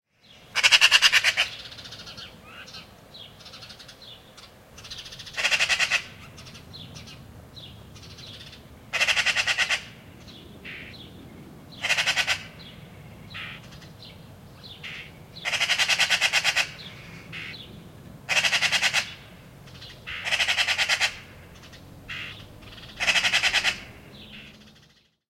Harakka ääntelee, räkättää lähellä. Taustalla pikkulintuja, etäinen lentokone.
Paikka/Place: Suomi / Finland / Vihti
Aika/Date: 27.06.1979